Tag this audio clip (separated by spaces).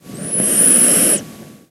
animal breath cat noise